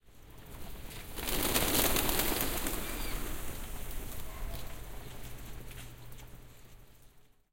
121003 Pigeon flock fly away, wing flaps, Toronto
Flock of pigeons flies away, wind flaps, urban. Sony M10. 2012.